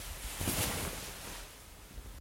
Swirling Velvet Cloak 2
Recording of me swirling a velvet cloak.
Low-mid frequency thumping and high-mid frequency fabric on fabric swooshing.
Recorded with a Zoom H4N Pro field recorder.
Corrective Eq performed.
cloak, clothes, clothing, fabric, rustling, swirl, swirling, swoosh, swooshing, velvet, velvet-cloak